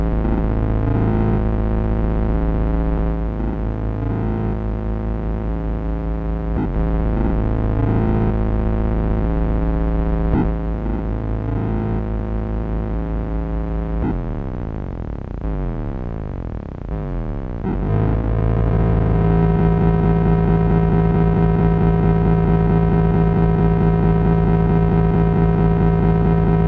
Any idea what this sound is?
Glitch sound from a circuit bent keyboard which eventually decays into a looping noise.
casio, lo-fi, circuit-bent, sk-1